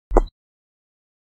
stone footstep 5
Footsteps on stone recorded with a Zoom Recorder
footsteps, field-recording, walking, steps, walk, step, stone, feet, foot, footstep